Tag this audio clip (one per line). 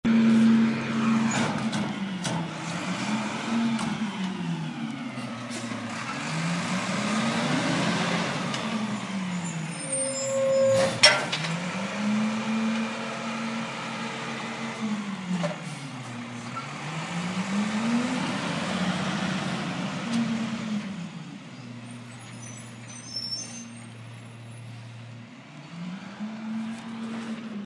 diesel
brake
Air
truck
Garbage
engine